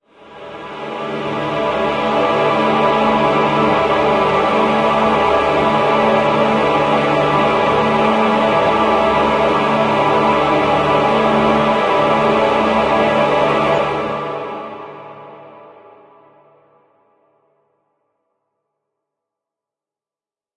Deep and dark dramatic choir with alot of disonances. Devilish in it's design. More brutal and forcefull.